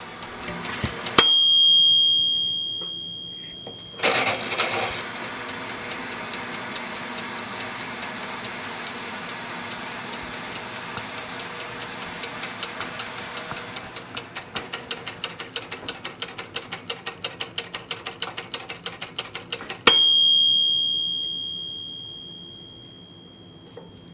overn timer-ding
over timer clicking and ding
cooking, clicking, oven, timer, ding, oven-timer